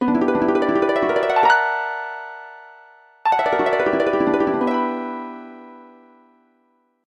Dreamy harp transitions.